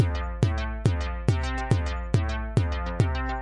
feeling like to dance
A dancy track!
beat, dance, game